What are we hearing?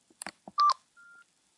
Nokia Bleeps
Pressing buttons on Nokia 6300 mobile phone during recording of sound file. Recorded with a 5th-gen iPod touch. Edited with Audacity.